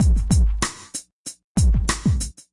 Just a Misc Beat for anything you feel like using it for, please check out my "Misc Beat Pack" for more beats.
Beat, Idrum, Misc